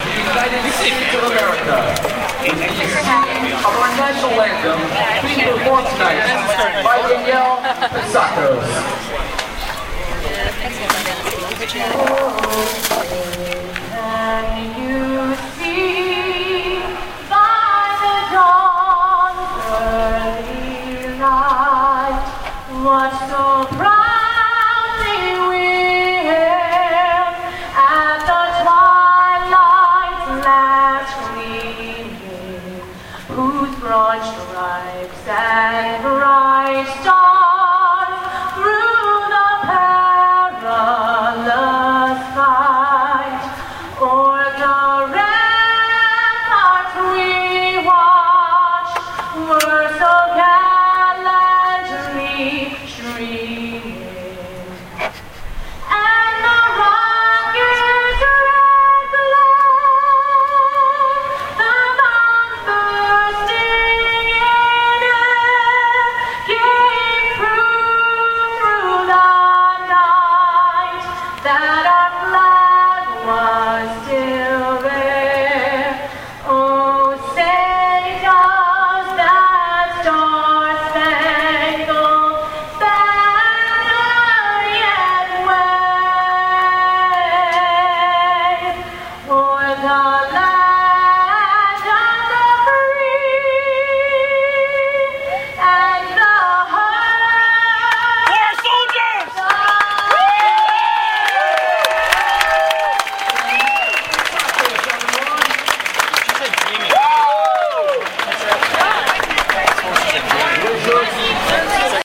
National anthem plays in baseball stadium, spectator yells "For our soldiers!" in background.